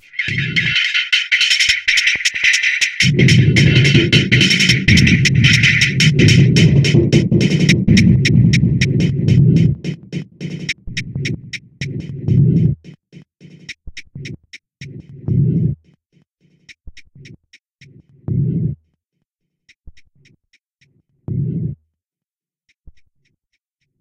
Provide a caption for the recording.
Flower loop 80 bpm 6
Since I really liked his description I had to pay him an honour by remixing this samples. I cutted up his sample, pitched some parts up and/or down, and mangled it using the really very nice VST plugin AnarchyRhythms.v2. Mastering was done within Wavelab using some EQ and multiband compression from my TC Powercore Firewire. This loop is loop 6 of 9.
80bpm, loop, rhithmic